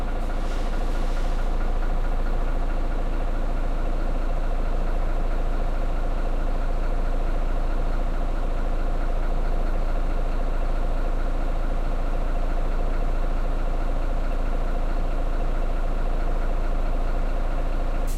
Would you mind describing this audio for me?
bus engine running inside
During bus engine is running
transportation, interior, engine